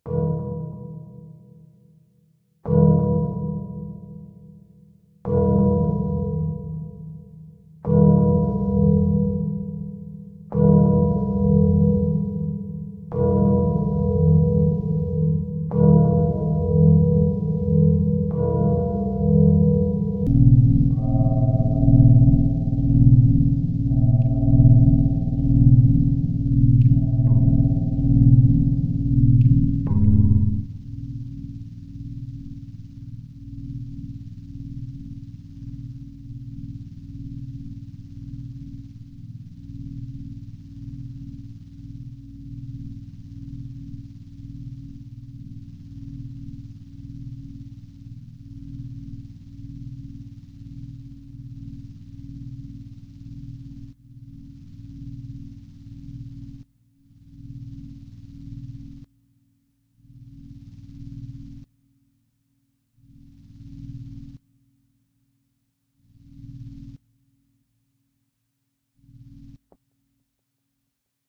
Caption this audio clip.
pad 009 deepspace hammered acoustics
deep pad sounds based on mallet sounds, physical modelling